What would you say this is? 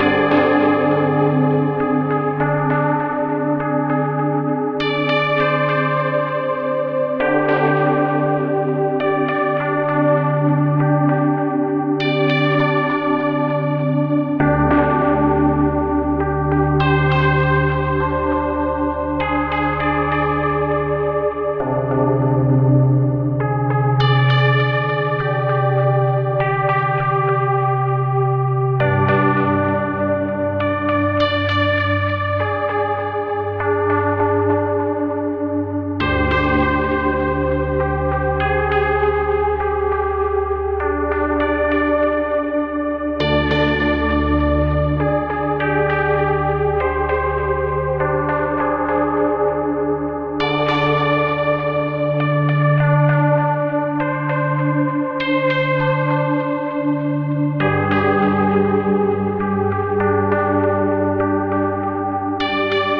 Smooth And sword pad that makes me very warm and fuzzy.
this synth was made using a vst in ableton live